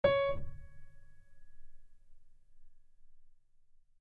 realistic piano tone
Acoustic, grand, piano, wood, real